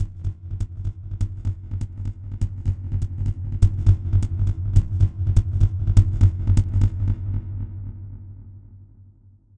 up in space, echomania